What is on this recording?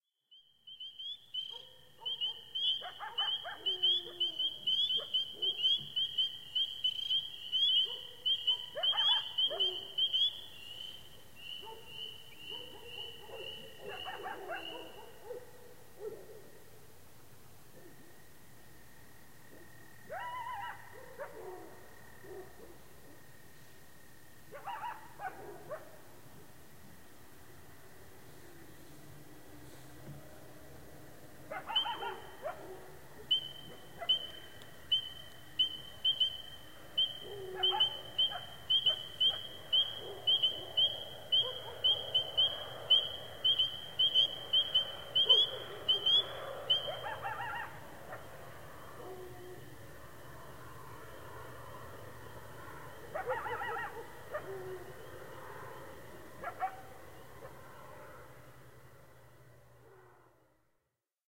Recording made at 11:30 at night on the edge of a pond near a deep forest....of course the spring peepers are chorusing, but then, from farther away, but definately still audible, some coyotes start calling out. Wonderful night-ambience. Enjoy